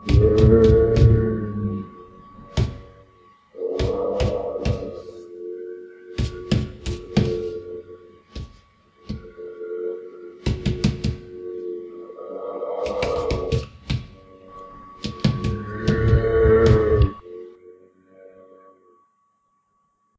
Recorded a bunch of my voice samples and banging on a wall using Audio Technia ATR2100
added reverb echo compression and reverse effects on FL Studio
Enjoy!